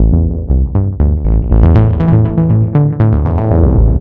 minitaur loop
A short loop I did with a sample from a Moog Minitaur. The loop was created in MetaSynth.
120bpm, electronic, loop, moog